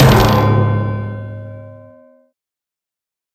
FragSound Gong
done as a costum fragsound for use in the FPS-game warsow.
Use for whatever you like...
hit, timpani, chimes, gong, frag, kill, coin